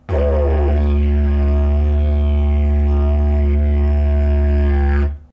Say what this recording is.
Didg Drone 9
Sounds from a Didgeridoo
aboriginal, australian, didgeridoo, indigenous, woodwind